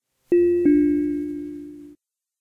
Alert indicating a programme could not be carried out.